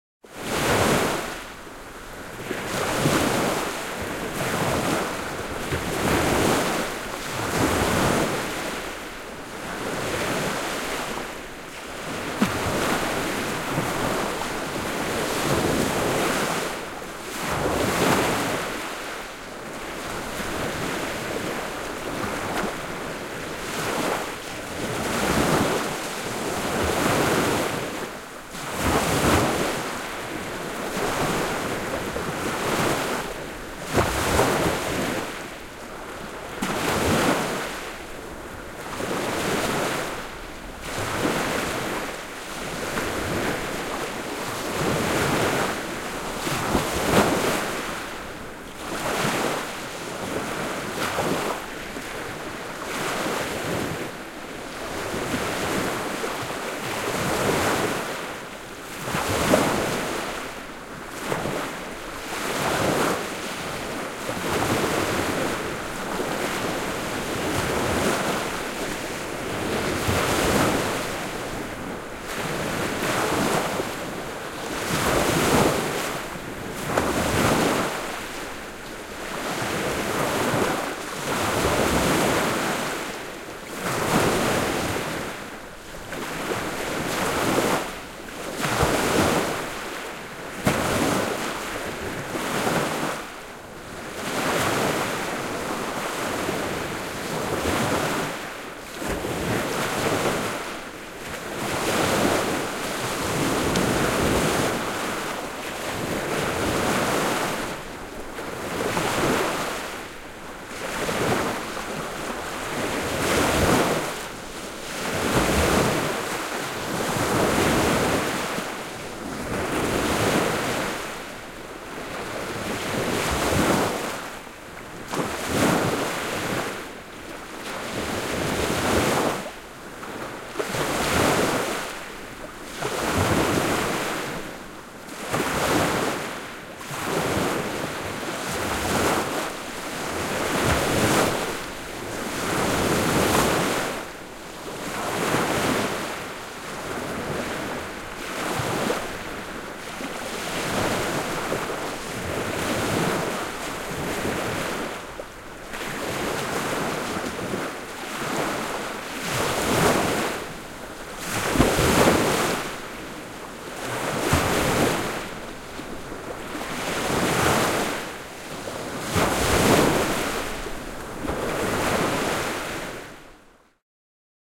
Kookkaat aallot hiekkarantaan / Bigger waves on the beach
Järven isohkot aallot lyövät rantaan, veden kohinaa.
Paikka/Place: Suomi / Finland / Puruvesi
Aika/Date: 04.07. 1994
Soundfx, Yleisradio, Tehosteet, Field-Rrecording, Lake, Suomi, Finland, Vesi, Water, Yle, Finnish-Broadcasting-Company